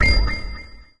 STAB 001 mastered 16 bit
An electronic percussive stab. Sounds like a bell with some distortion
on it and some low frequency rumbling. Created with Metaphysical
Function from Native Instruments. Further edited using Cubase SX and mastered using Wavelab.
electronic, short, percussion, industrial, stab